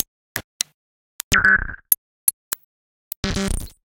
MinimalBeats 125bpm02 LoopCache AbstractPercussion
Abstract Percussion Loop made from field recorded found sounds
Abstract, Loop, Percussion